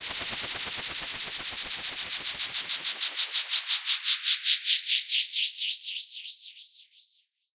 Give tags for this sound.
Enigma; filtered; noise; pitch-bending; slowing; sweep

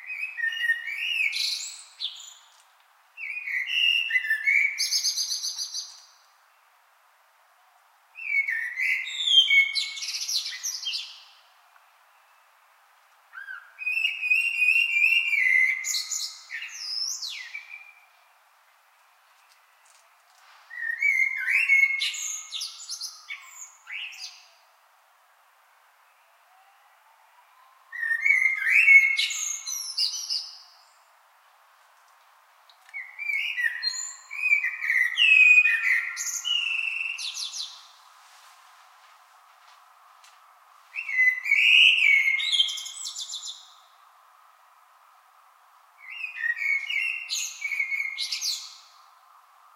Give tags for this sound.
birds; city; field-recording; spring; streetnoise

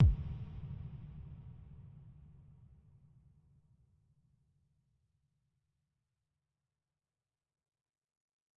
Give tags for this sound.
bassdrum; club; crisp; reverb

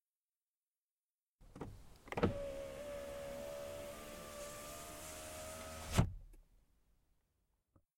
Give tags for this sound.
CAR; CZECH

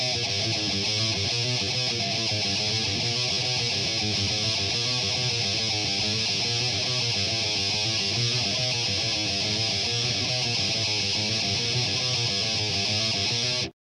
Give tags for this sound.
groove; guitar; heavy; metal; rock; thrash

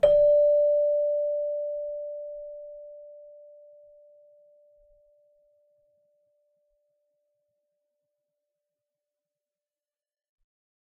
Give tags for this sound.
bell; celesta; chimes; keyboard

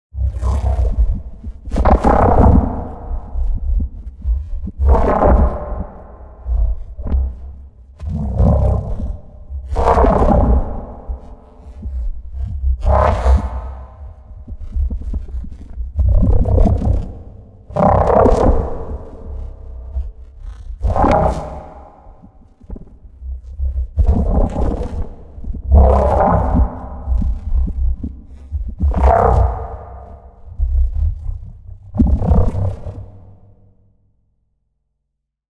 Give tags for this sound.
alien; fx; horror; robot; sci-fi; synth

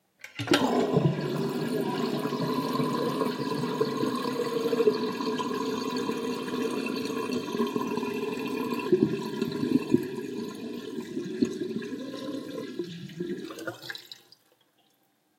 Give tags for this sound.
stream; liquid; dumping; flow; water

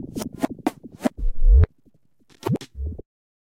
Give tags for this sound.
atmosphere; Atmospheric; ambient; background-sound; sci-fi

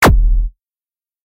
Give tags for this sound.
core; kick; Flashcore; flash

